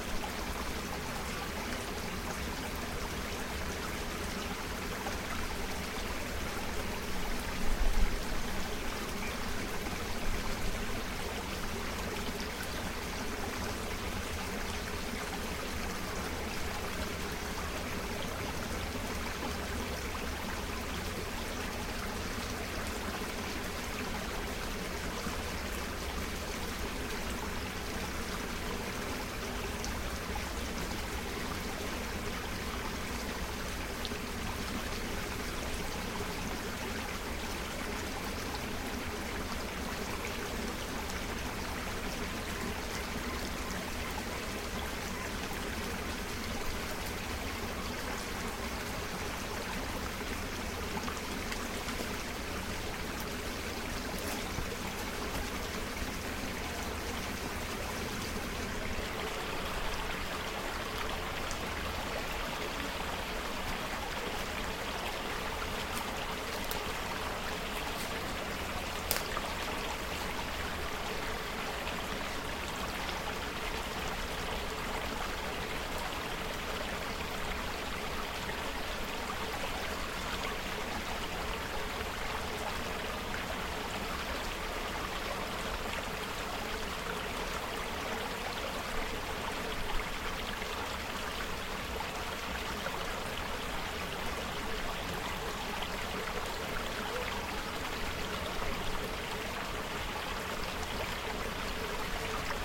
stream sound 2
ambient recording of a small stream in Auckland New Zealand part 2 - this is within earshot of a road though mostly usable
stream, creek